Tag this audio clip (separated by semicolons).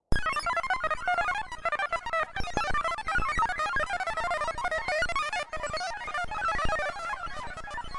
abstract; broken; digital; electric; freaky; futuristic; glitch; machine; mechanical; noise; sound-design; strange